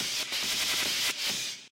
Reverse Skip
A few sample cuts from my song The Man (totally processed)